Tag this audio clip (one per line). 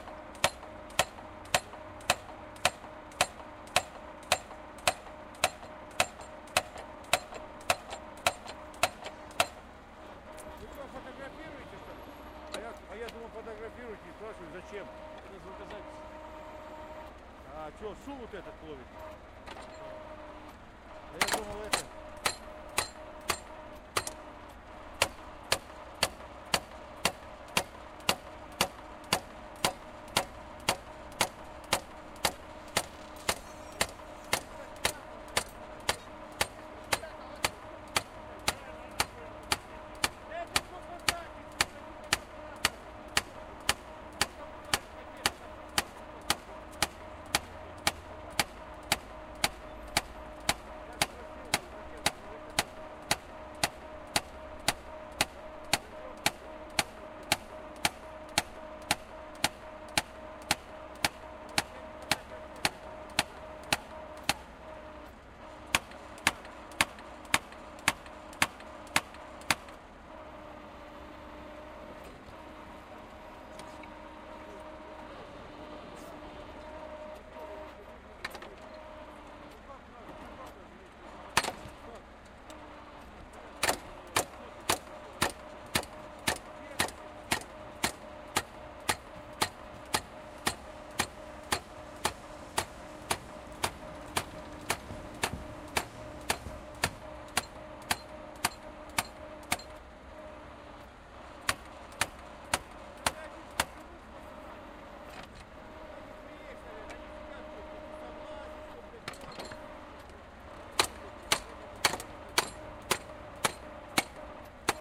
rumble
clatter
pneumo
mechanical
noise
pneumo-hammer
city
town
costruction
hammer
tractor
repair